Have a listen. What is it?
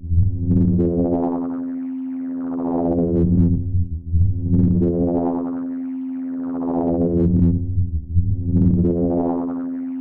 Patch2a 10s mono
Part of a little private dare with user gis_sweden.
This is my challenge to him.
Patch created with one sine oscillator doing FM on a low pass filter and an LFO at 0.25Hz also modulating the filter.
I specified the sound to be 10s long, but just realized that this will not be loopable. Will upload also a longer version which will be loopable.
filter-FM, kamiooka, modular, synth, virtual-modular, VST, VST-modular